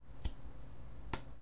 flippng a ruler on my hand